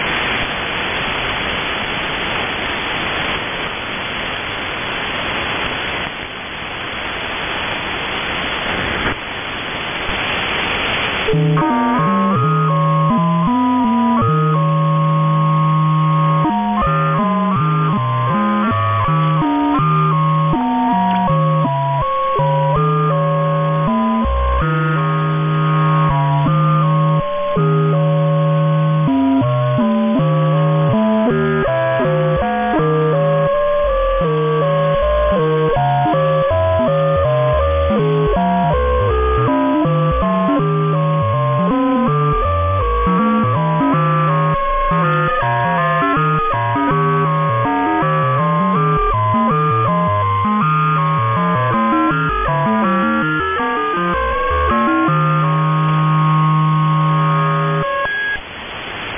It's Also On AM
The mystery broadcasts of 14077 are on AM too! This is where I found it the first time. Heavy AM static then a distorted melody. No idea what it is. Recorded on the website.
Its broadcasts are musical-sounding tones, it could be a 'numbers station' without voices but this question presently remains unanswered.